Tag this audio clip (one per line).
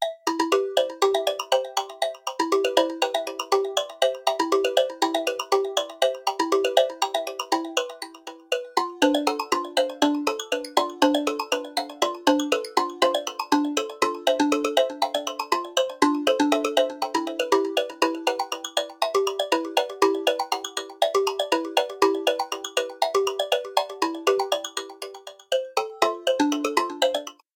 random
sequence
synth